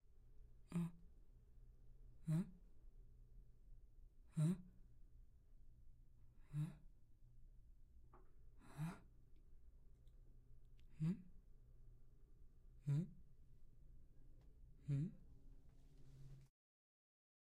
35-Intrigue Murmur
Intrigue, Murmur, Woman